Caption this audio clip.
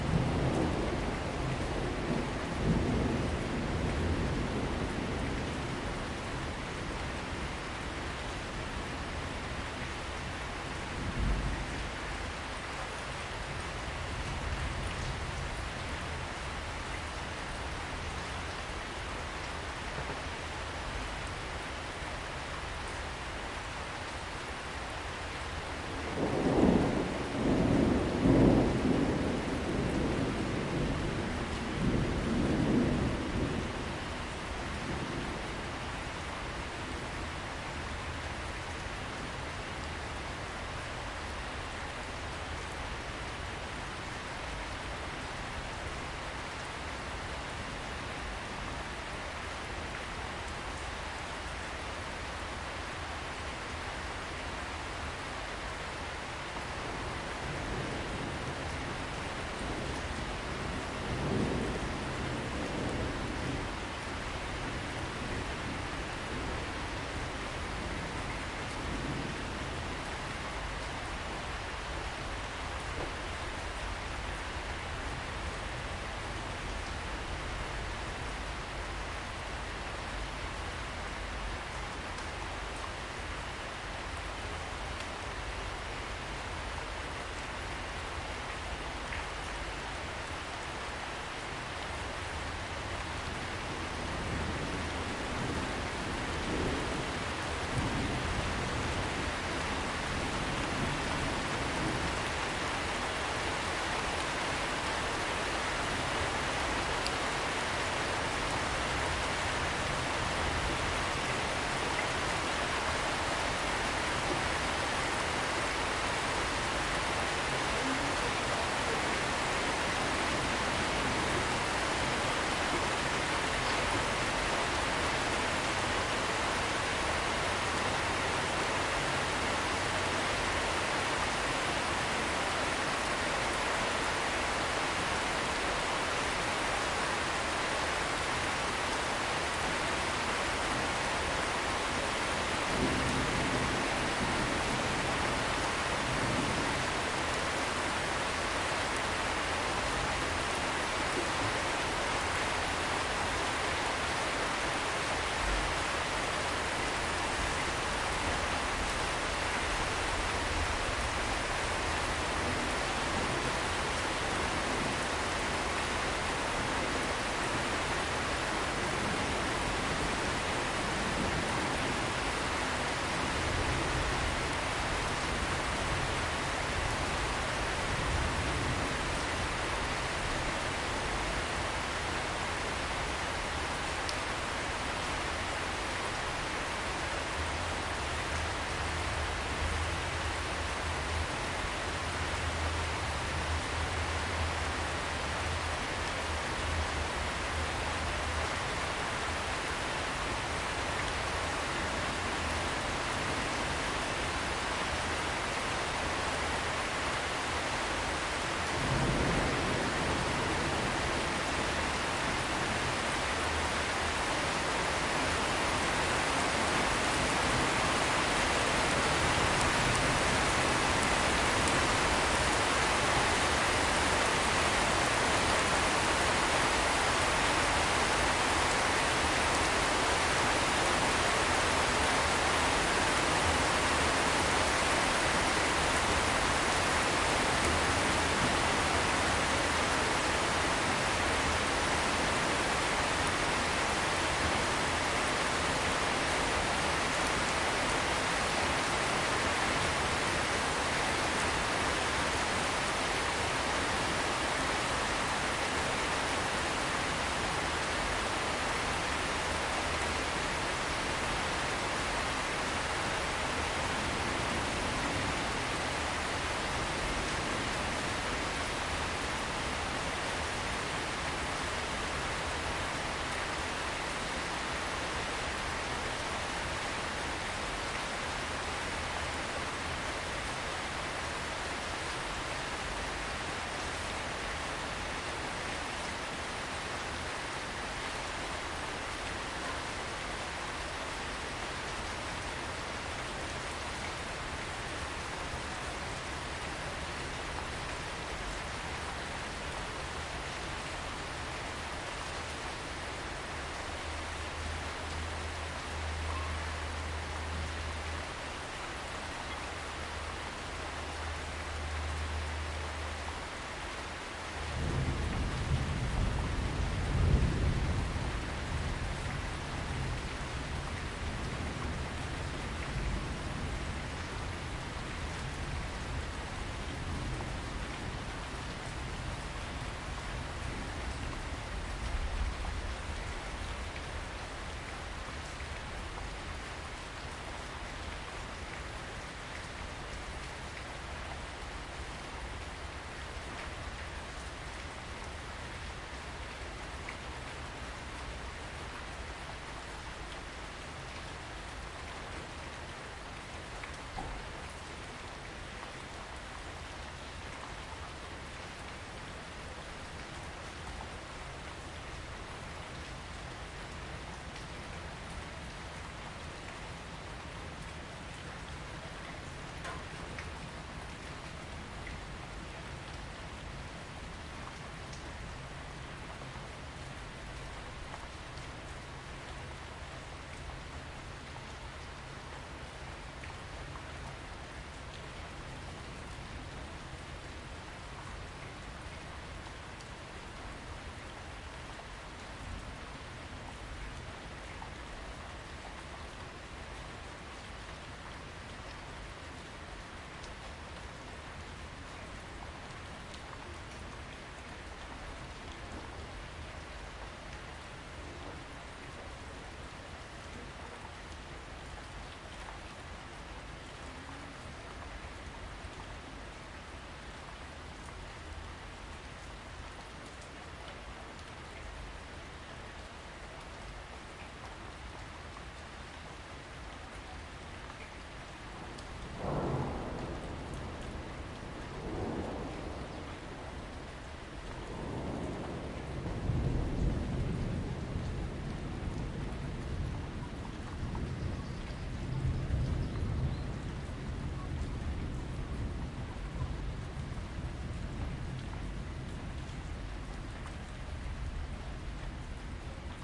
thunder rain birds
Distant thunder, intensifying rain, some birds.
thunder, field-recording, birds, rain